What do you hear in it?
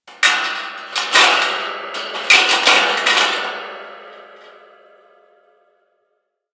CD STAND OF DOOM 034
The CD stand is approximately 5'6" / 167cm tall and made of angled sheet metal with horizontal slots all the way up for holding the discs. As such it has an amazing resonance which we have frequently employed as an impromptu reverb. The source was captured with a contact mic (made from an old Audio Technica wireless headset) through the NPNG preamp and into Pro Tools via Frontier Design Group converters. Final edits were performed in Cool Edit Pro. The objects used included hands, a mobile 'phone vibrating alert, a ping-pong ball, a pocket knife, plastic cups and others. These sounds are psychedelic, bizarre, unearthly tones with a certain dreamlike quality. Are they roaring monsters or an old ship breaking up as it sinks? Industrial impacts or a grand piano in agony? You decide! Maybe use them as the strangest impulse-responses ever.
cup, contact, impulse, big, dreamlike, gigantic, evil, hands, group, converters, huge, frontier, hand, bizarre, cool, cd, alert, audio, design, echo, ball, dream, industrial, dark, edit, cell, enormous, disc, impact, compact